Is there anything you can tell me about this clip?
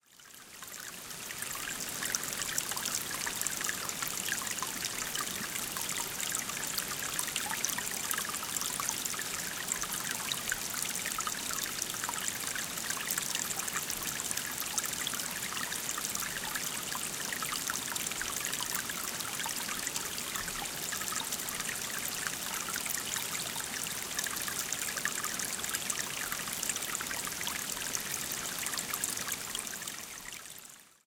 Field-recording of water slowly trickling down a stream.
Recorded in Springbrook National Park, Queensland using the Zoom H6 Mid-side module.